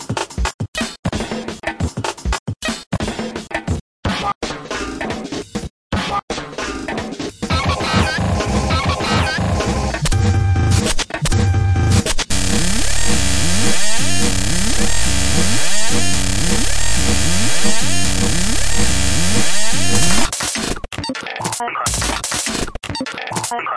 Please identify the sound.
random sound collage to build samples up
glitch, idm